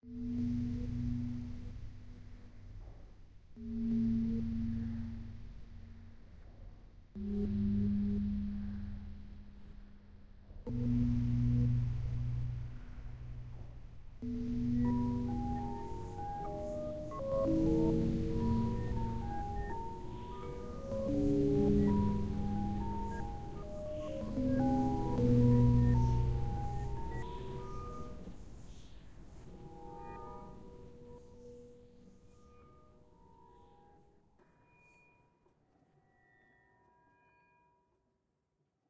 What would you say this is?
Tape Bowls 2